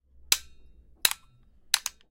13. Roce metal con metal
touch between metal materials
metal, touch